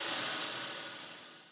minigun Overheat
an overheat sound for a minigun or other type of weapon that uses a cooldown
cooldown, game, weapon, video, overheat, minigun